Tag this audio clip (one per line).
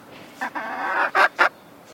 animal
Chicken